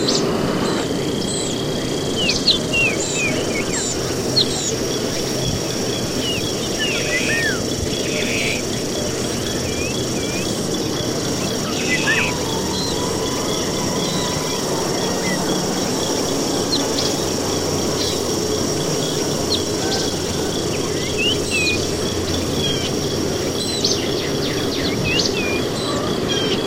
The sound will loop seamlessly.
birds, alien, animal, critters, space, synthesized